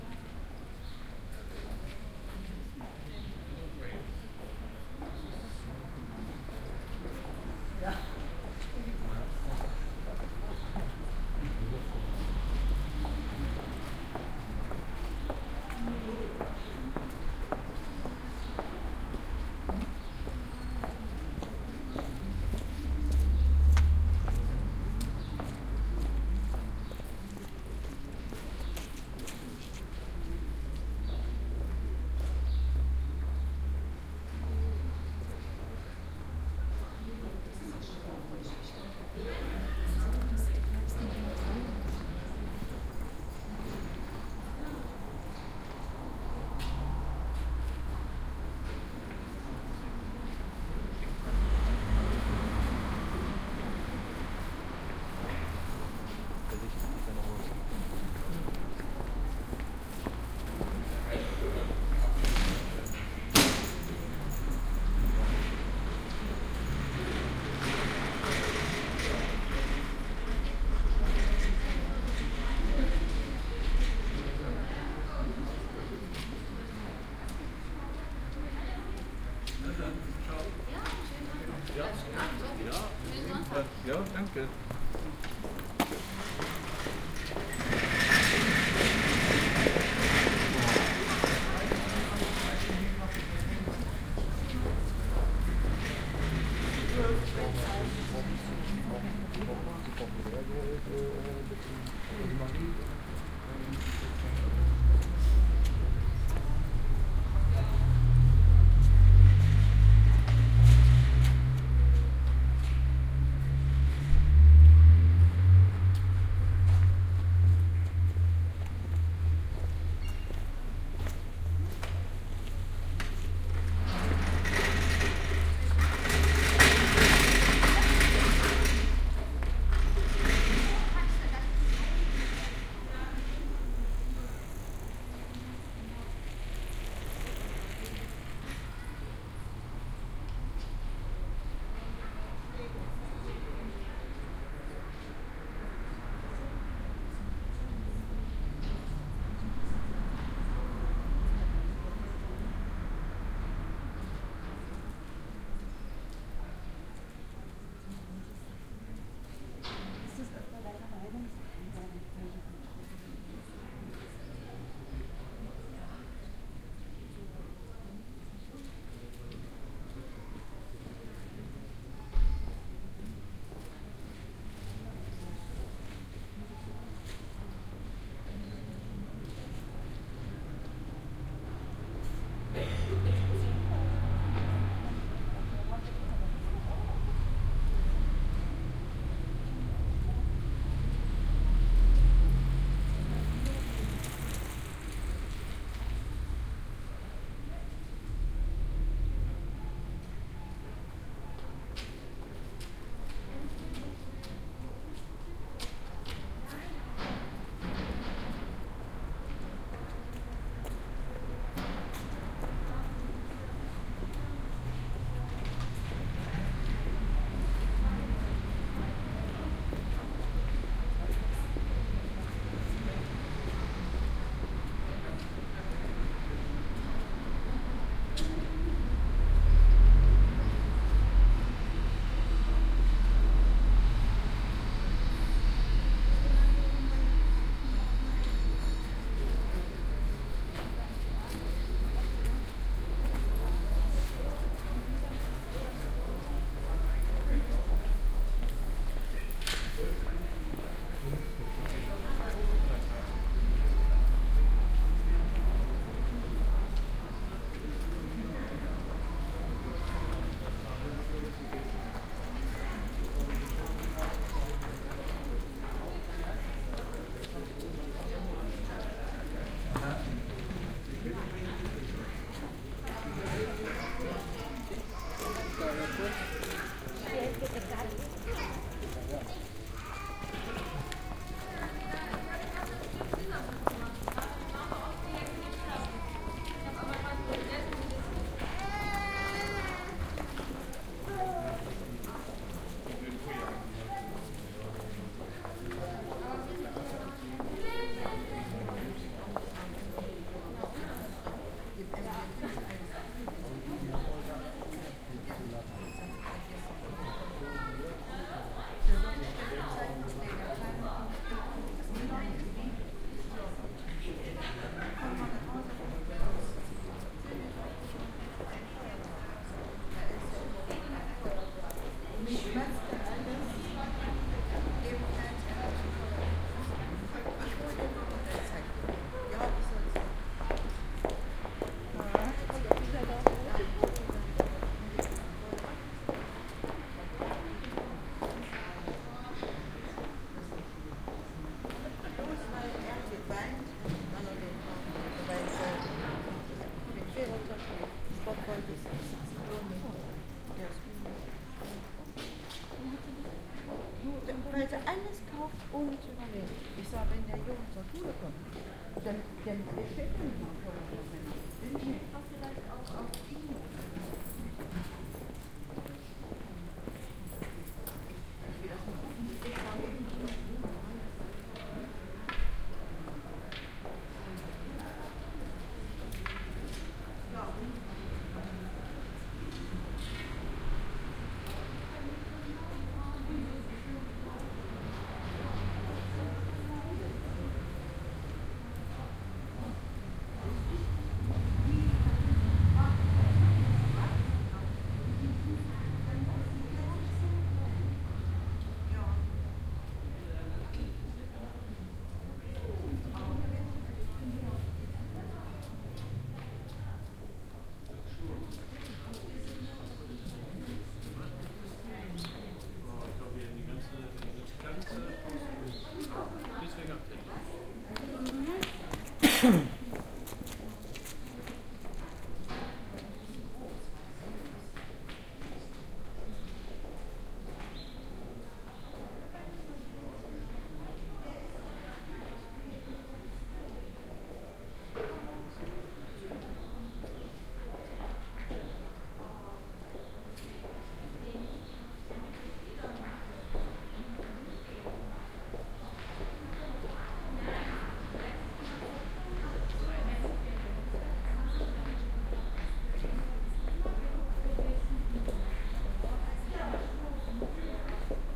A few minutes of sitting on a bench in the pedestrian area of Wernigerode, a town in the Harzmountains. Not very exciting and pretty geermmmann, but alright, if you have ever been in this lovely town. OKM microphones into PCM-M10 recorder.